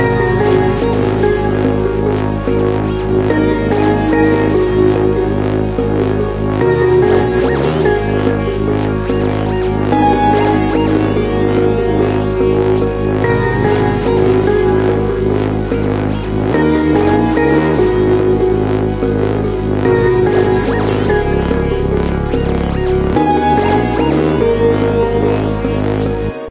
passion ringtone
mysong, alert, messedup, hip, ringtone, project, mobile, hop, cell